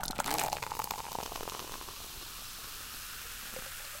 pouring soda in a cup